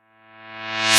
I generated a "pluck" with audacity at note 45, gradual decay, and reversed it. Maybe you could add this at the end of a song as a strange ending, to be cool or something.